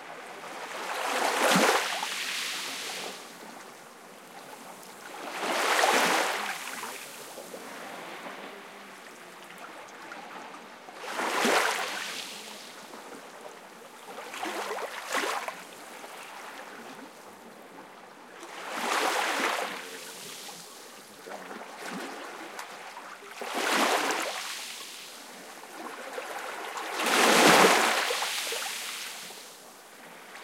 soft Mediterranean Sea waves + the noise of gravel being moved around. Shure WL183, Fel preamp, PCM M10 recorder. Recorded in an inlet near Las Negras (Almeria, S Spain)